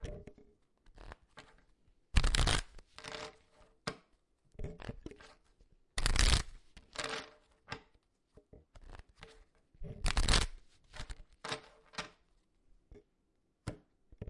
shuffling cards
shuffling some cards
shuffle, cards, shuffling, playing-cards, deck